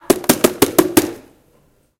mySound SPS Sara
Sounds from objects that are beloved to the participant pupils at the Santa Anna school, Barcelona. The source of the sounds has to be guessed.
Belgium, Stadspoortschool, Sara, CityRings, mySound, Ghent